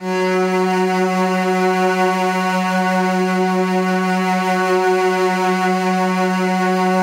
1514 S2stgorchFRK-F#2-TMc
Looped in Redmatica KeyMap-Pro. Samples have Note/Key data embedded in audio files. Just load into a sampler and hit the "automap" button, otherwise map to note names in the file names.
Bowed, Modeled-String-Orchestra, Multisample, Soft, Strings